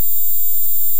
Experiments with noises Mandelbrot set generating function (z[n + 1] = z[n]^2 + c) modified to always converge by making absolute value stay below one by taking 1/z of the result if it's over 1.